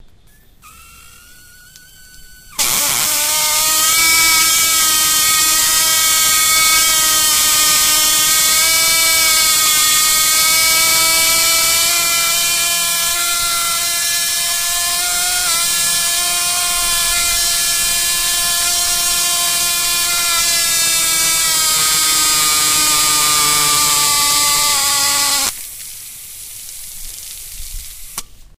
I have a particular hose sprayer that, when slightly depressed, oscillates rapidly, producing an extremely loud and extremely annoying sound. A rather unusual sound. Recorded on an H4, internal mics, normalized in Goldwave.